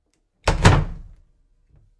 Wooden Door Closing Slamming